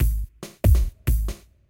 140 bpm break beat loop 2
140 bpm break beat drum loop
140-bpm, break-beat, loop